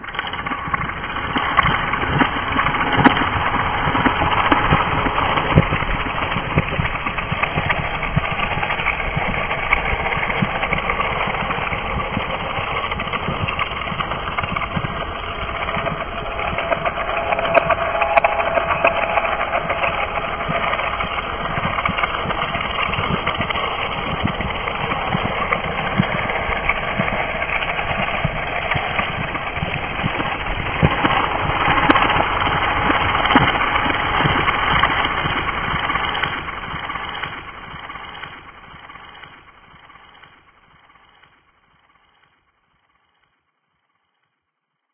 Nightmare Mechanical Ambience
Walking one evening recently along a riverside trail, my dog picked up an over-long stick and trotted along with it in his mouth. The original sound the stick made as my dog dragged it along the ground sounded a lot like crickets. I captured the sound with my Android phone by using the Smart Voice Recorder app. I then took those two channels, copied them to a new stereo track, then reversed that track to give that backwards heart-beat sound in the background, which was, in the original recording, just my footfalls on the trail.
creepy, machine, spooky, eerie, fear, horror, tension, dark, scary